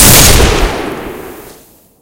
Pistol Shot
A pistol/handgun fire sound made for my Doom 3 mod, this sound was specially made for games
this sound was recorded using a HDR-PJ540, then edited using Audacity
you can download said mod here
fire, firing, foley, game, gaming, gun, handgun, pistol, shooting, shot, weapon